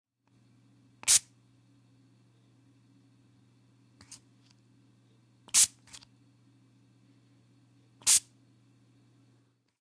Spray sound. You can use it in your foley projects. Recorded on Logic Pro X with Rode NTG-3 and Audient iD4. EQ was applied to lower frequencies only to reduce noise.
deodorant, spray, spraying